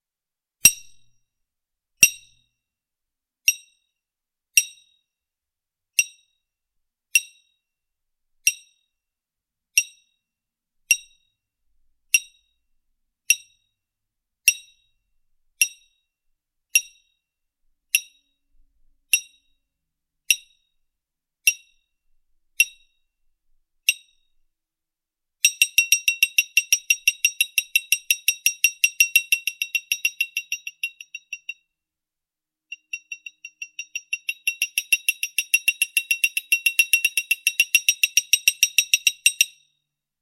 Glass and metal
Metal hit a glass cup
glass, Mus152, cup, sound